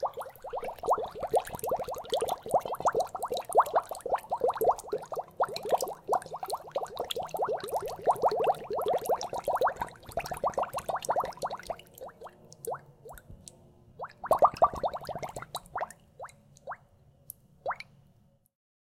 running water bubbles-02
many bubbles made with air-filled bottle
under water of a sink
this one has smaller and continuous bubbles
recorded with sony MD recorder and stereo microphone
bubbles, environmental-sounds-research, running